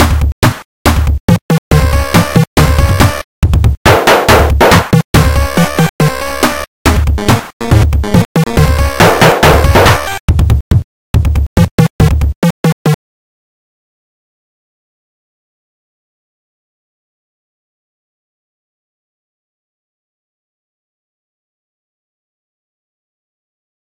πŸ”₯ Loop action music for a indie game πŸ”₯
🌟 Podcast of free content 🌟
βœ… Join us on π —¬π —Όπ ˜‚π —§π ˜‚π —―π —²:
βœ… π —§π —²π —²π ˜€π —½π —Ώπ —Άπ —»π —΄ store:
βœ… π —™π —Ώπ —²π ˜€π —Όπ ˜‚π —»π —± page (foley for beginners):
❀️𝗦𝗨𝗣𝗣𝗒π —₯𝗧 𝗨𝗦 π —ͺπ —œπ —§π —› 𝗔 π —Ÿπ —œπ —žπ —˜ 𝗔𝗑𝗗 𝗦𝗛𝗔π —₯π —˜!
bits, 8-bit, action, loop, videogame, shoot, free, melody, game, 16-bit, synth, indie